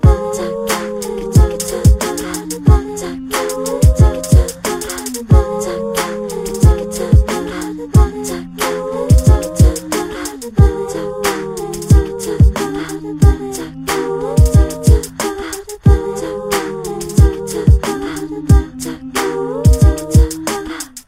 Loop NatureGoddess 03
A music loop to be used in storydriven and reflective games with puzzle and philosophical elements.